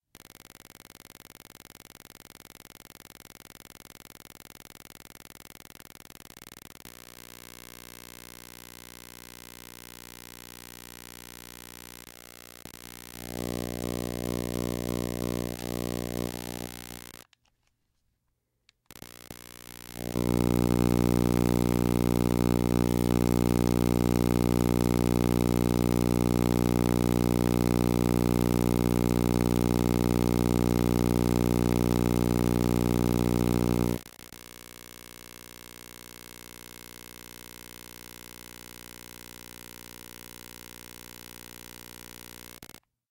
electric low power 01
This is an old electric drill with the trigger being pulled to a very low level.